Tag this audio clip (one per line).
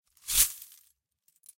broken
glass
shake